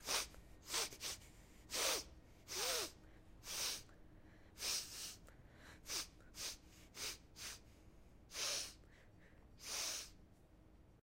Recorded using a Zoom H6 recorder. The sound was recorded by someone violently sniffing in an open outside area.
Allergies, City, Flowers, Mhhh, Nose, Outside, OWI, Pollen, Sick, Sinus, Smelling, Smells, Sniffing, Snot, Spring